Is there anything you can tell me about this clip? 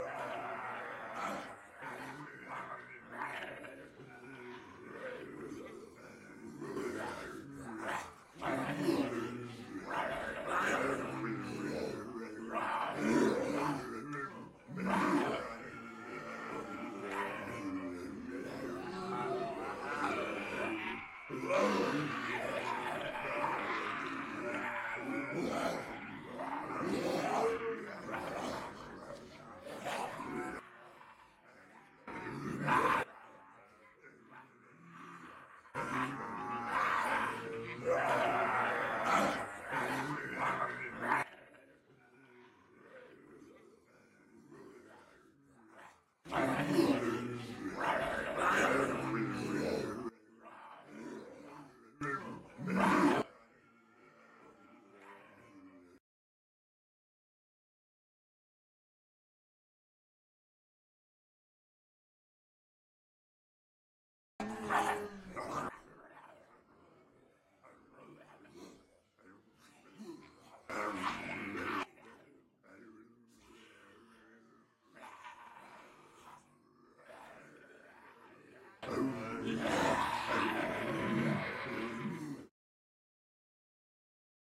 Zombie Group 8B
Multiple people pretending to be zombies, uneffected.